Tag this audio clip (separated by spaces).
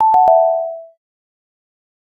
collect energy game item life object pick-up